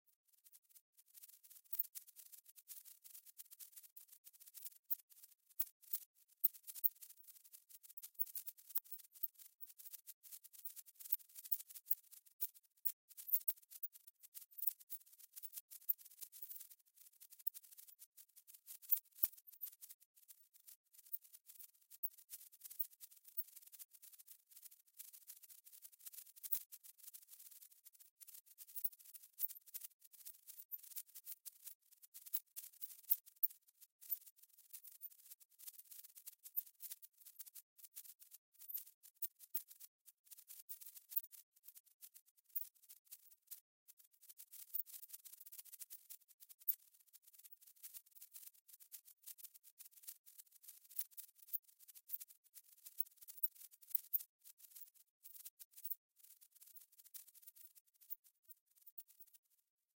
This sample is part of the "Space Drone 3" sample pack. 1minute of pure ambient space drone. Very thin and short noise bursts. Low volume.